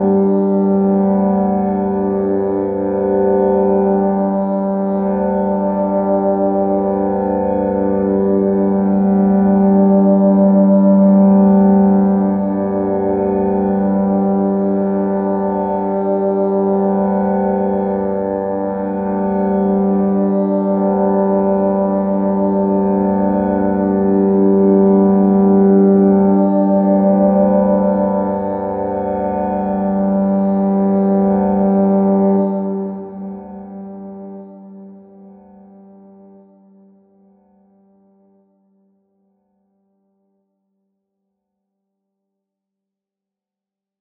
nice easy pad, sort of oriental feeling
synthetic, india, pad, space